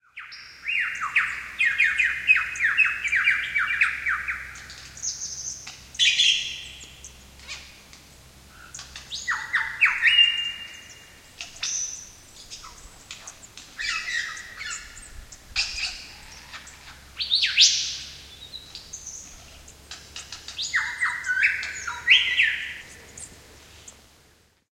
Lyrebird Take 1
When you don’t have your sound kit on hand, it’s by any means available and all i had was my Canon Powershot G12 camera, so that’s what i used. And i must say i’m pleasantly surprised!
This is the sound of a Lyrebird that we happened upon when going for a stroll in the Kinglake National Park in Victoria, Australia. It was recorded less than 50 metres from the Mason Falls car park.
atmos, atmosphere, bird, bird-song, field-recording, forest, lyre-bird, lyrebird, nature